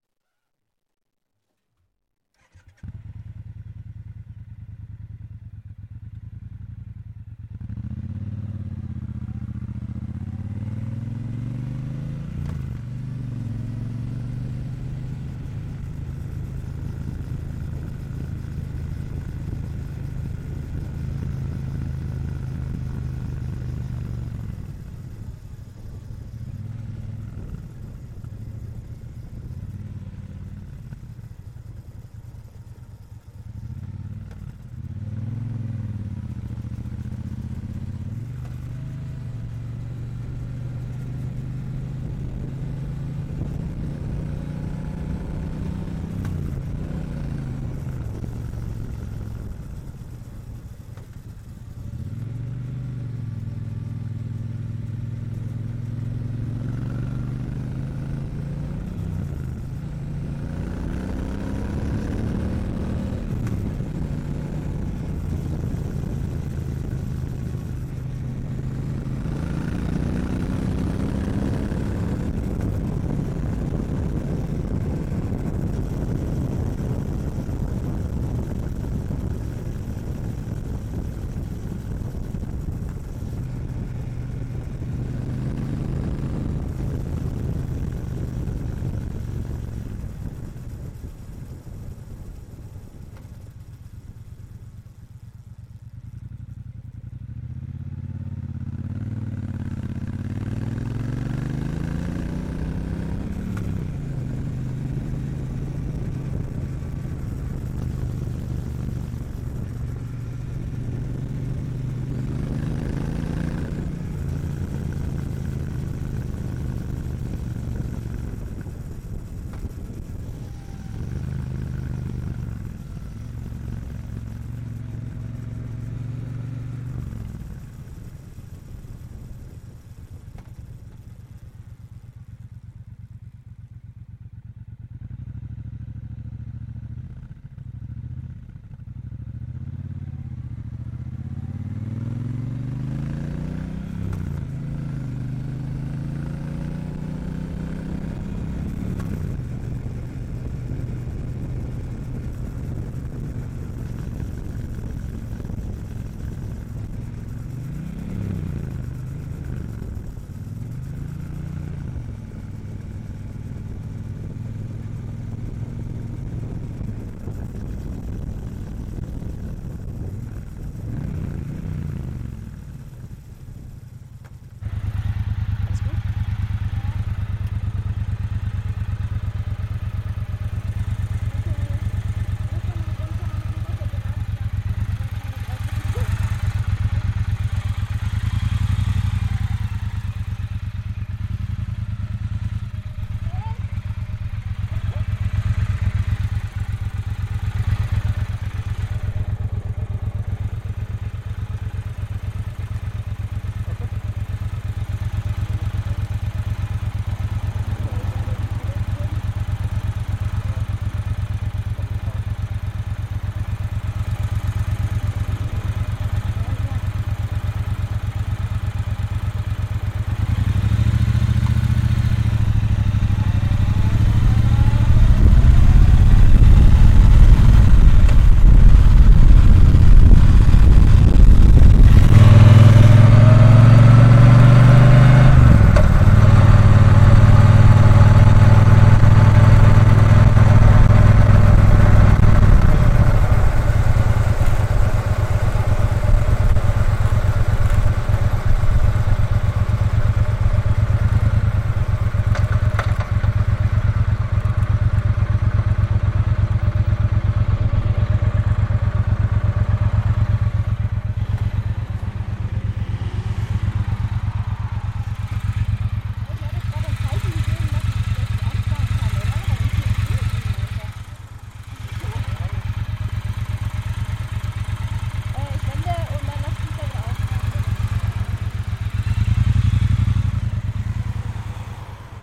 the sound of riding on a bonneville speedmaster motorcycle, such wind